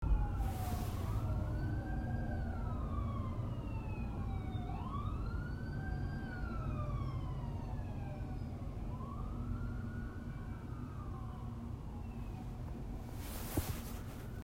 sound-Sirens from inside apartment
Recording of ambulance/police sirens in Washington DC (from inside an apartment)
rescue, police, ambulance, siren, emergency, sirens